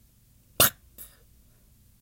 onomatopeya pac
cartoon sound done with human voice